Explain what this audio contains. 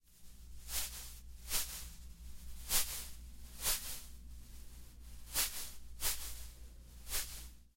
pasos, grama, caminar
caminar, grama, pasos